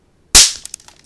A simple popping noise, if you slow it to lower than 25% of its original speed, it sounds like an explosion